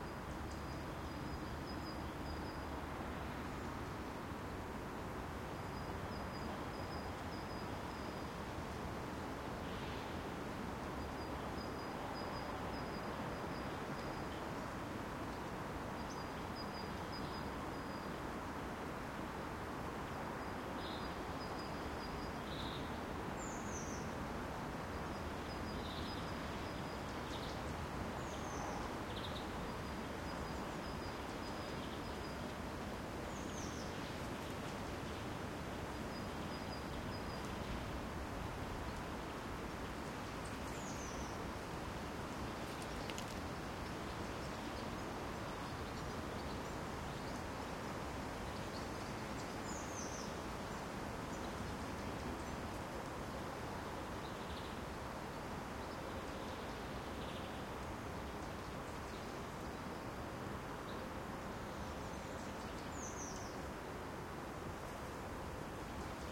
alley quiet calm distant skyline traffic birds Berlin, Germany MS
birds, traffic, Berlin, calm, alley, quiet, distant, skyline